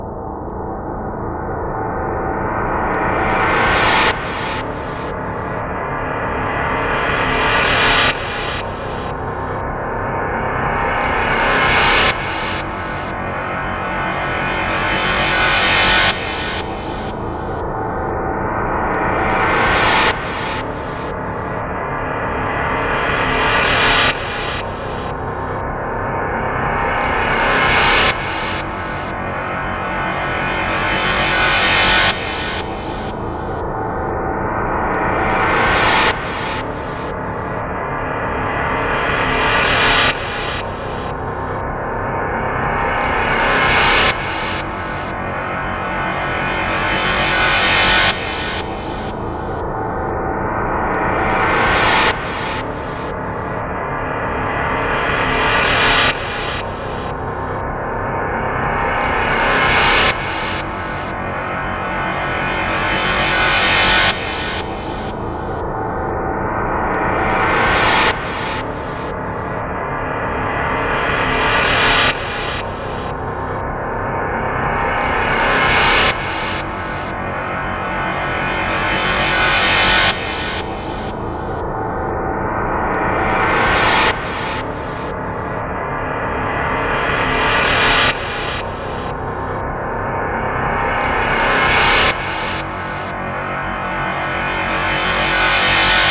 Build Up Tune
A tune that builds up an anxious atmosphere.
anxious, atmos, atmosphere, background-sound, creepy, drama, dramatic, Gothic, haunted, hell, horror, macabre, nightmare, phantom, scary, sinister, spooky, terror, thrill, weird